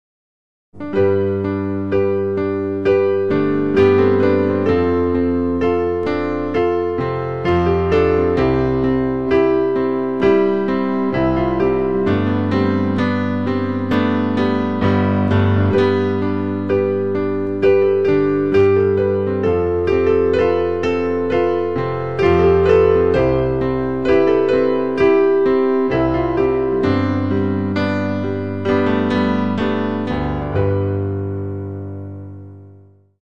Piano Chord Progression
Music, Original, Piano, Sample, Song